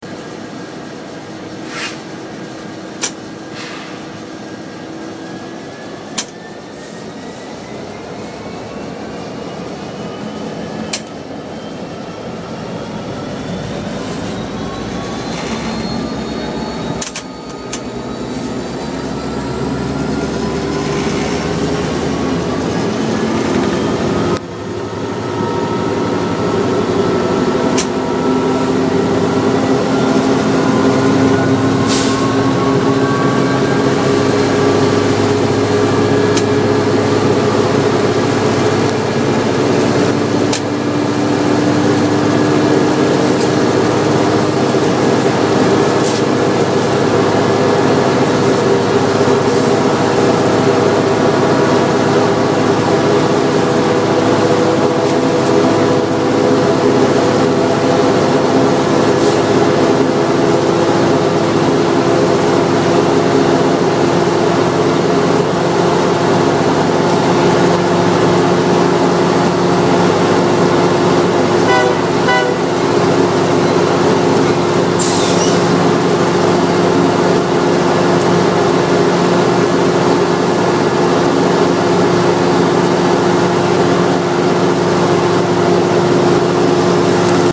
4000+hp v-16 emd locomotive
emd load test 40035 hp v-16 notch 8
40035, emd, hp, load, test, v-16